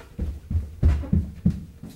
running up stairs
running, stairs